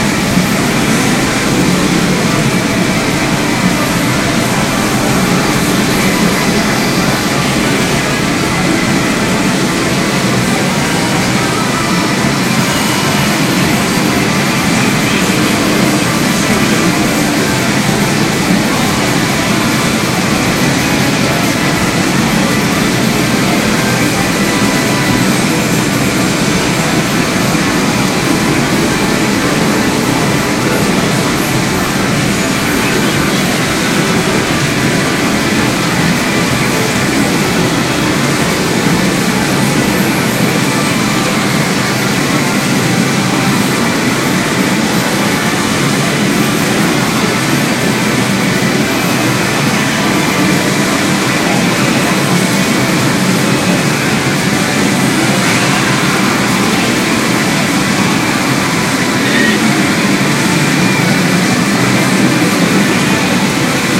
Ginza Noise 01
Ginza_Noize in japan.